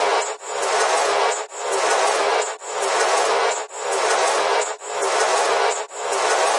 Remix of some old recording done last couple of years. Some guitar and drum stuff effected into a part of a 3 part repeating loop.
1 of 3.
Mac computer and audacity.
block, buzz, electronic, freaky, Lo, machine, noise, part, pulse, puzzle, remix, repeating, sound-design, system, weird, wood